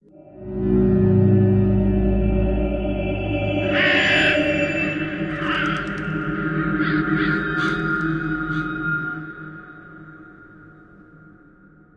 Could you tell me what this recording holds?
ab hauntedharbour atmos
intro to the haunted harbour layered with crow effect
ambient, atmospheres, drone, evolving, experimental, freaky, horror, pad, sound, soundscape